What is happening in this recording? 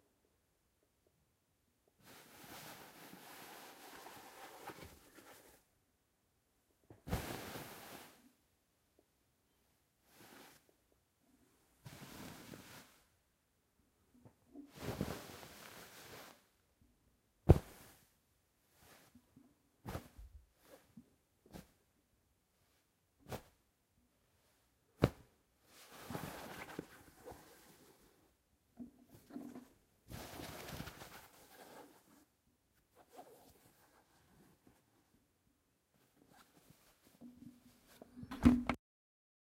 bed sheets moving hitting scratching
Some sounds of bed sheets recorded with a Roland R-26.